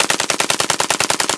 Machine Gun Burst
burst, machinegun, shot, shooting, akm, bullets, machine-gun-burst, machine-gun, gun, weapon